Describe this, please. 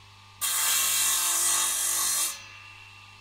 circ saw-05
Distant circular saw sound.
electric-tool, saw, circular-saw